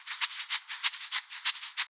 Me shaking the salt and pepper shakers